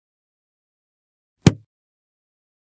Closing book
Closing a book recorded with AT 4033a to Digi003 rack.
bum, hit, close, book, snap